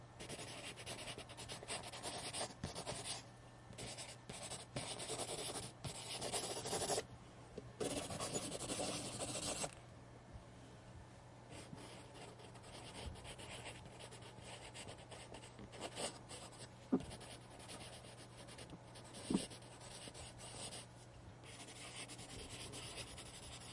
Writing with pencil- energetic
Writing energetically with a B(#1) pencil on paper.
pencil, writing, scribbling, paper